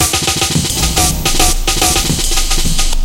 03.11 loop amen rif 7
Amen meak in FL studio 10
with break cybeles and snare sample
amen, breakcore, core, fx, gitar, loop, noise, postcast, rif, studio